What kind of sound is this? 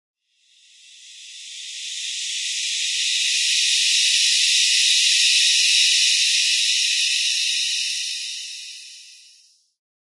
Pad sound, could be a rain forest or a pack of hissing snakes.
ambient, edison, dirge, soundscape